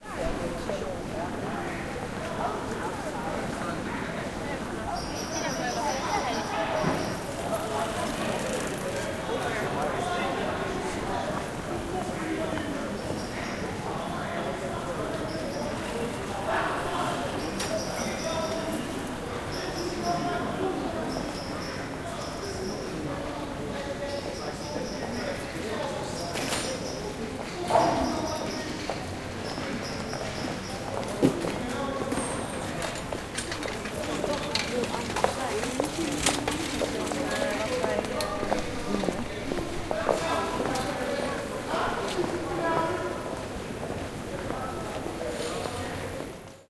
city walla bicycles shouting netherlands 001
Zoom H4n X/Y recording of city ambience in the Netherlands
people, holland, soundscape, field-recording, street, general-noise, bicycles, ambience, ambient, dutch, cars, netherlands, walla, city, town, shouting, traffic